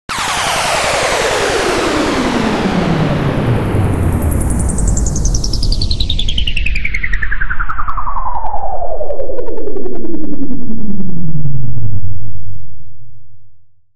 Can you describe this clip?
Energy Release
I made this sound in Ableton Live 9. You can make it whatever you like. Right now it sounds like a big energy weapon powering down.
fire, decompress, energy, weapon, gun, shoot, release, cooldown